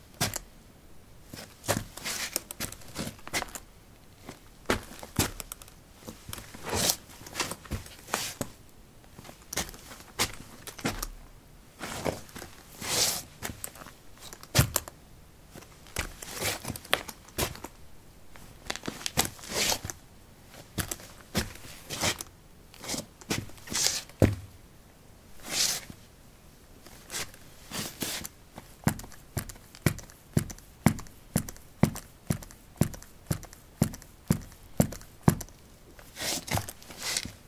soil 17b boots shuffle tap
Shuffling on soil: boots. Recorded with a ZOOM H2 in a basement of a house: a wooden container placed on a carpet filled with soil. Normalized with Audacity.